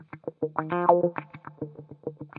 GTCC WH 07
wah, guitar, bpm100, fm, samples